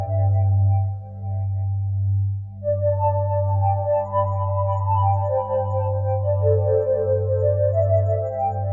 This loop has been created using program garageband 3 using the HybridMorph synthesizer of Garageband 3